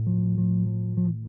recording by me for sound example for my course.
bcl means loop because in french loop is "boucle" so bcl
bass, loop